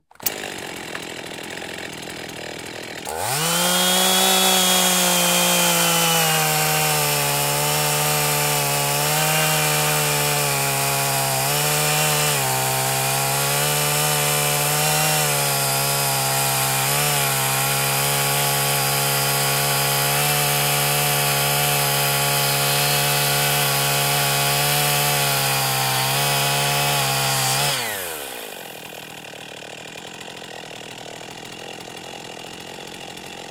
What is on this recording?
The chainsaw is pull started, idles for a quick second, and then cuts through a log for approximately 25 seconds.